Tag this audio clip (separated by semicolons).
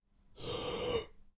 air; breath; noise; shock; shocked; suspense; tension; wind